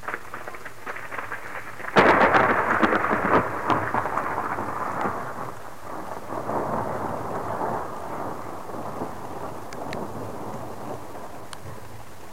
smart crackling thunder recorded by a cassette recorder and a mono microphone in a storm on 7th of august, 2006.